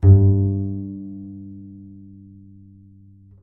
Part of the Good-sounds dataset of monophonic instrumental sounds.
instrument::double bass
note::G
octave::2
midi note::43
good-sounds-id::8727